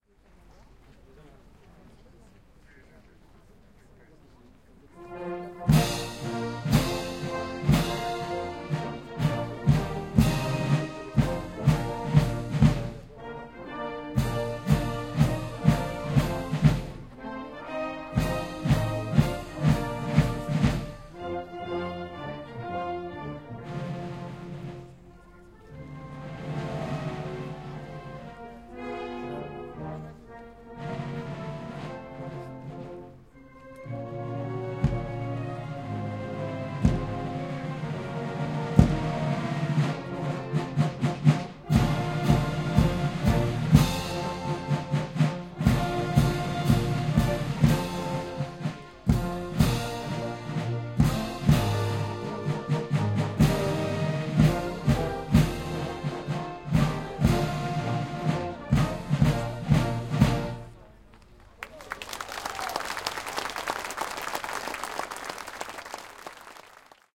VOC 220925-2129-2 FR FrenchNationalAnthem
French National Anthem performed by the Official French Republic Marching Band.
In the city of Rueil-Malmaison (near Paris, France), every 3 or 4 years, Emperor Napoleon, who was an important people in French History, is celebrated.
For this special event, the very famous Official French Republic Marching Band (called Fanfare de La Garde républicaine), performs the French National Anthem (titled La Marseillaise) during a free concert held in the park just in front of Château de Malmaison (Malmaison Castle). In the background, some voices of people watching, and enthusiastic applauses at the end, of course !
Recorded in September 2022 with an Olympus LS-100 (internal microphones).
Fade in/out applied in Audacity.
ambience, anthem, applauses, atmosphere, brass, brass-band, concert, crowd, drums, Fanfare-de-la-Garde-Republicaine, field-recording, France, French, French-Republic, history, lively, marching-band, military, music, national, official, outdoor, people, Rueil-Malmaison, soundscape, traditional, voices, woodwinds